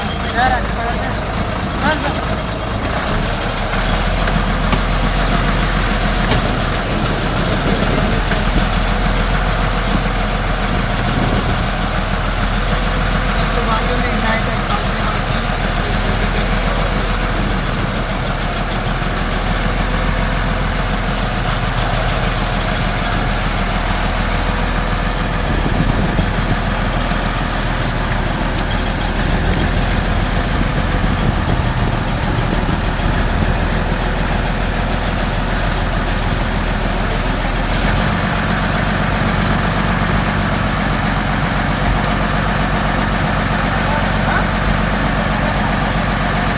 motorboat loud throaty diesel Indian fishing boat slow speed or idle rattly with some voices and bangs India
boat, diesel, fishing, idle, India, Indian, loud, motorboat, or, rattly, slow, speed, throaty